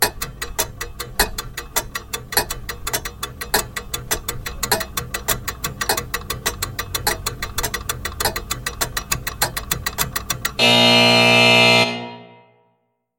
Time Running Out & Buzzer
A game show sound played during the last 10 seconds of time to answer the question and a fail buzzer at the end.
Edited with Audacity.
arcade, buzzer, clock, fail, failure, faster, game, game-over, game-show, hurry, limit, lose, lost, show, tac, tic, tick, ticking, time, time-is-running-out, timer